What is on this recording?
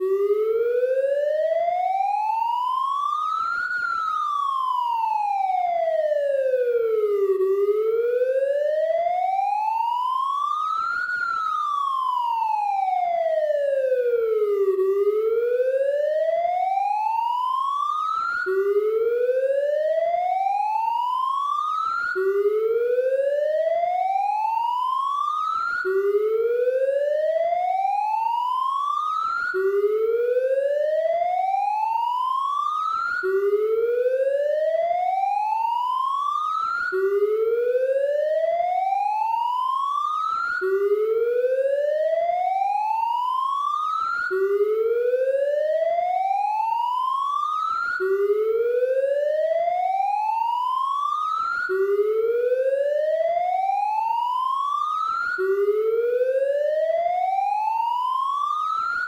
Police Sirens(far)
This sound was made with beepbox and it is a police siren sounding like it is nearby.
911, dispatch, emergency, police, siren